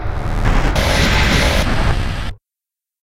STM1 Uprising 3
Over processed bass hit. Loud. Fades in... then out.
bass, mechanical